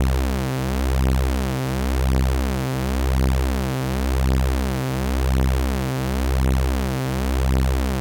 Detuned Sawtooths C1
Detuned sawtooths good to make bass sounds
Sylenth1, 128, Detuned, C1, Sawtooths